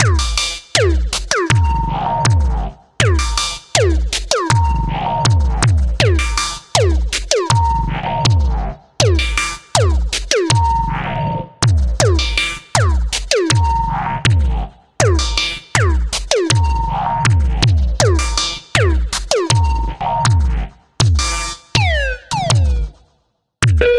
80 bpm Stoned 23rd Century Attack loop 6
ATTACK LOOPZ 01 is a loop pack created using Waldorf Attack drum VSTi and applying various Guitar Rig 4 (from Native Instruments) effects on the loops. I used the 23rd Century kit to create the loops and created 8 differently sequenced loops at 80 BPM of 8 measures 4/4 long. These loops can be used at 80 BPM, 120 BPM or 160 BPM and even 40 BPM. Other measures can also be tried out. The various effects go from reverb over delay and deformations ranging from phasing till heavy distortions.
drumloop, 4, 80bpm